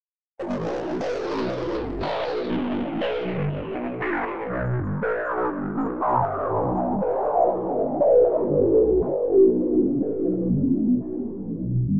low treated synthesiser lead
synth, soundscape, riff